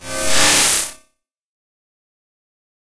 noise effect 1
effect, noise, sci-fi, strange